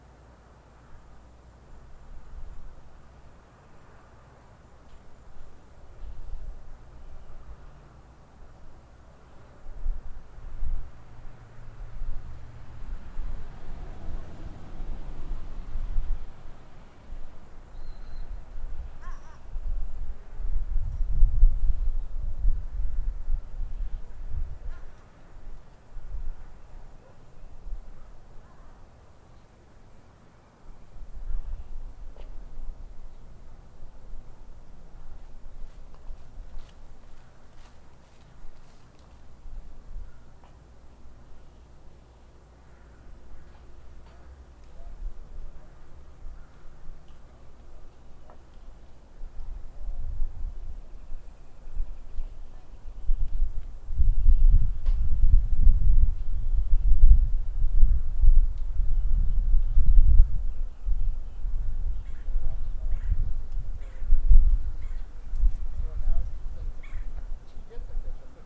Out on the patio recording with a laptop and USB microphone. I placed the microphone up on top of the terrace this time to get sound from outside the privacy fence.
atmosphere, field-recording, outdoor, patio